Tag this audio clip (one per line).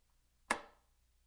swtich
lightswitch
flick